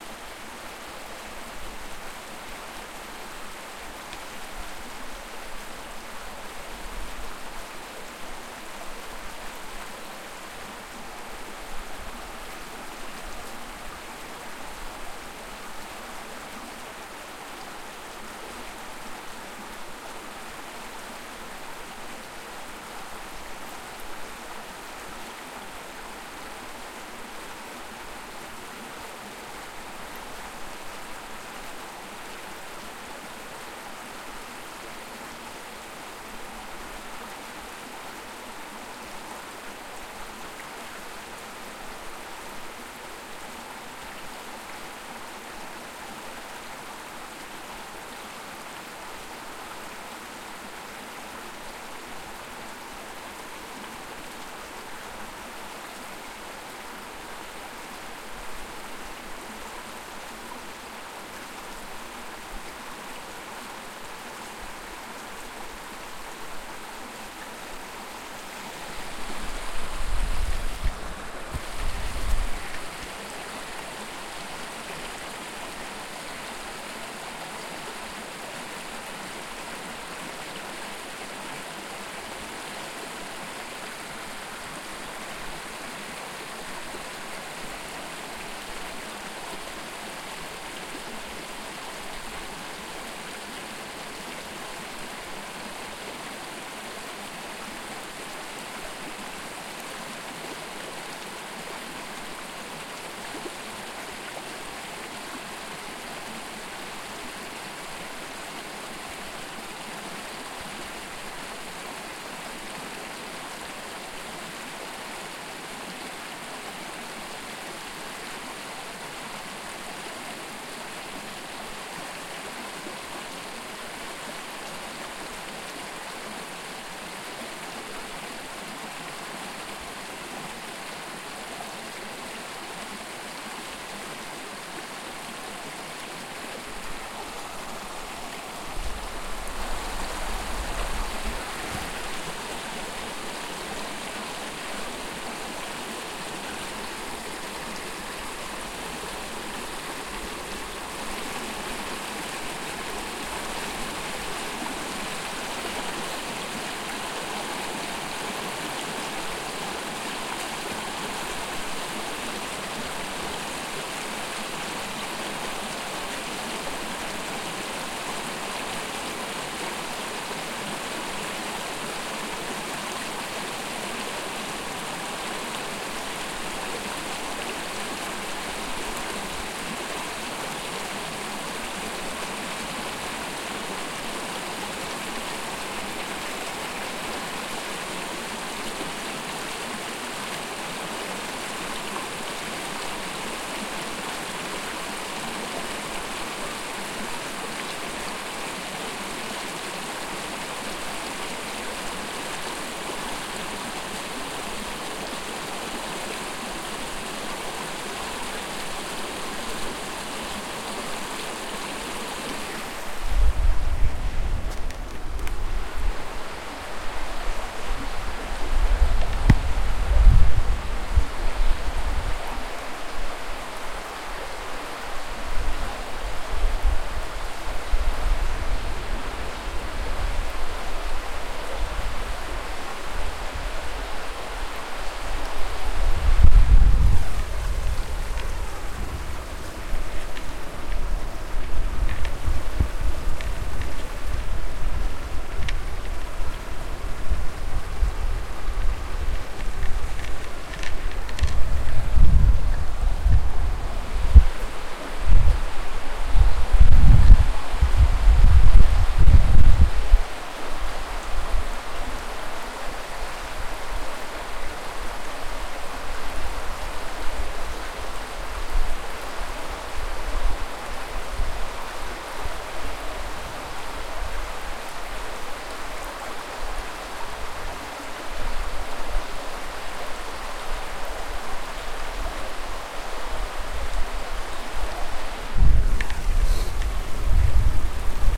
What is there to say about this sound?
sound of a stream flowing with different angles